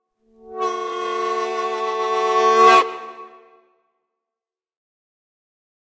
Violin recorded with a Earthworks SR25 microphone and Apogee Ensemble, mixed with the SSL X-Verb reverberation.